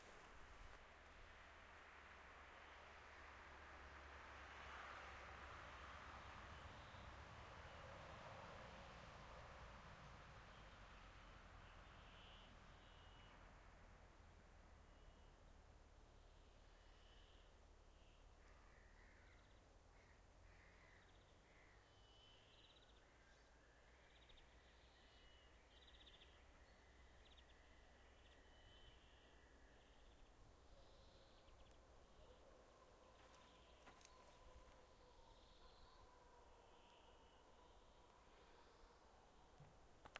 Single Car Passing Birds and Dog
The sound of Hailuoto, silence, birds, dogs and every now and then a car, when the ferry arrives a lot of cars.
island, sonicsnap, fieldrecording